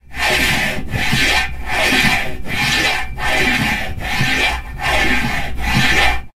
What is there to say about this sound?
Phasing Effect
Made by scraping a drum stick through a metal music stand, no effects applied